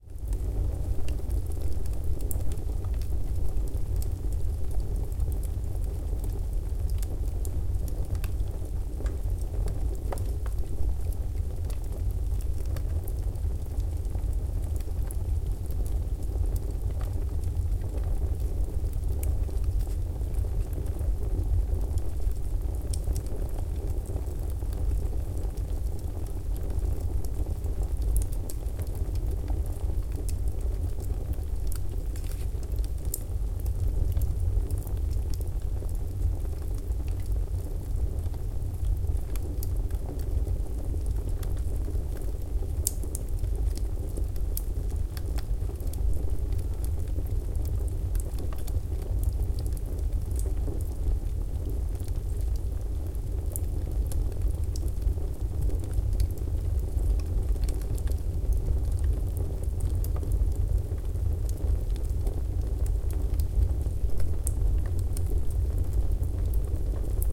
Stove burning v1